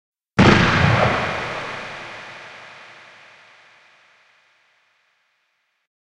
weird explosion
Weird stlyised "bomb" type explosion sound I created for a stage play.
bang, bomb, explosion